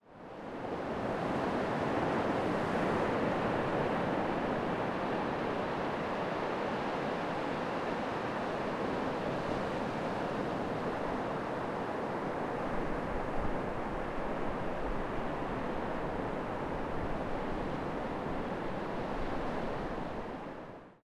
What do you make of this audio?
wave-sound-noise-pink-morocco
Some sea-sounds I recorded for a surfmovie. It features pink noisy-wave sounds. Recorded in Morocco